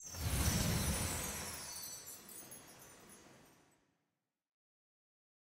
Game wizard sound rpg game
rpg, whoosh, sounds, game, magic, sound